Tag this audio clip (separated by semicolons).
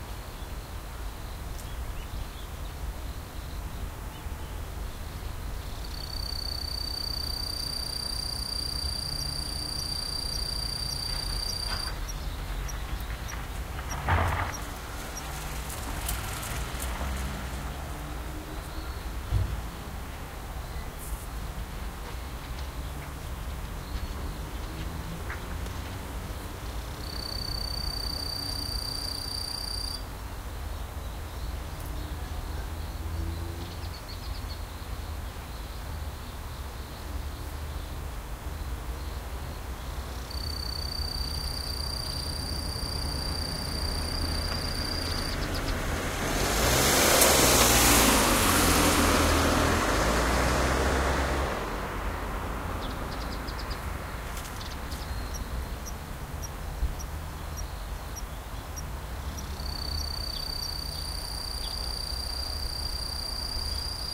autumn; gravel; late-summer; field-recording; motorbike; kashiwa; outside; traffic; ambience; cicadas; town; atmosphere; cars; countryside; birds; steps; ambiance; fall; nature; bugs; japan; outdoors; city; rural; motorcycle; walking; crickets; footsteps